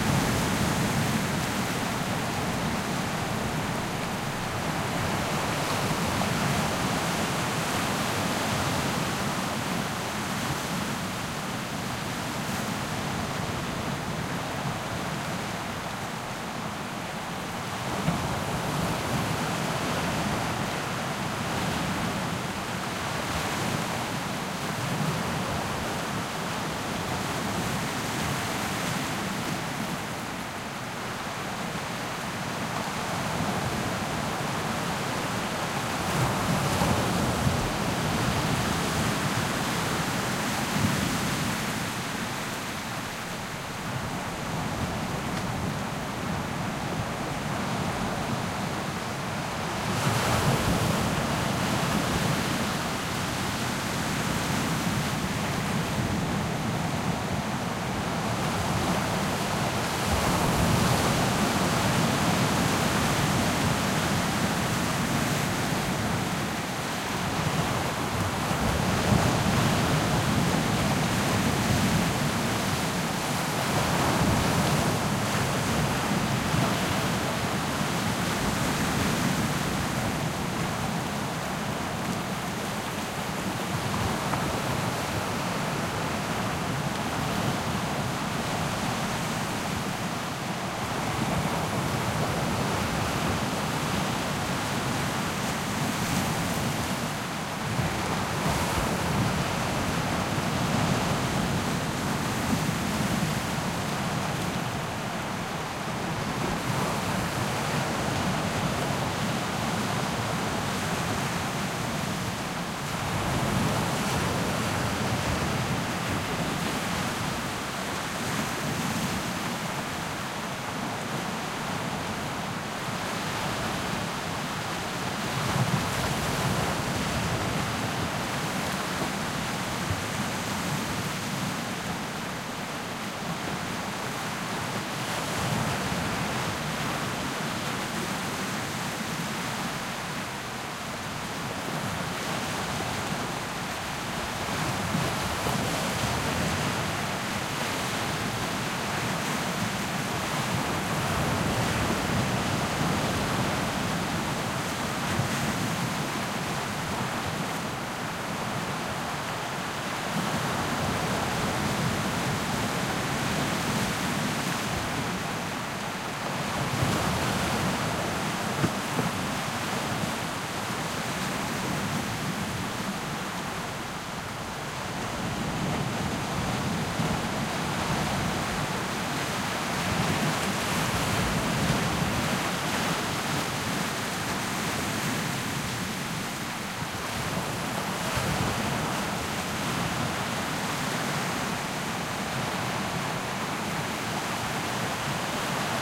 Waves On Rocks Tasmania
Recording of small waves breaking on a rocky shore. Recorded from about 40 meters from the water. The frequency of the waves was such that there is no clear wave-to-wave sound, although the crashes can be heard they rise out of the general mash of sound.
beach, ocean